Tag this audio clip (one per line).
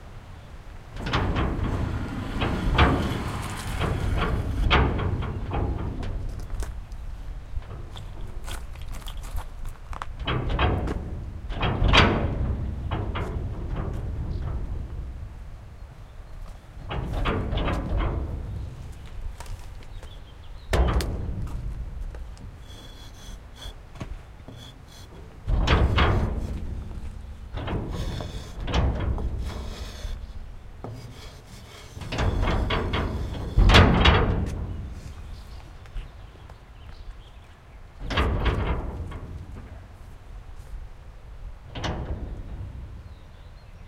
brick
fx
metal
sound
strange